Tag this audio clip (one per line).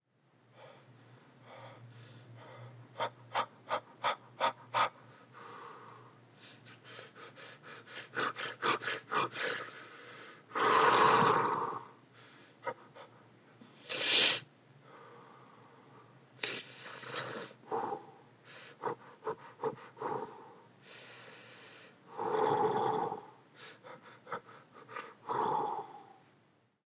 Breathing
Respiracion
Man